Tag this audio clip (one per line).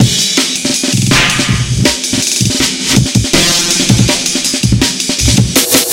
amen
beat
dnb
loop